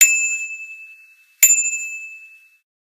bicycle-bell 10
Just a sample pack of 3-4 different high-pitch bicycle bells being rung. This one is two for one!
bell, bells, percussion, ring, bike, clang, metal, bicycle, high-pitched, contact, metallic, ping, chimes, ding, chime, bright, glockenspiel, hit, glock, strike, ting, ringing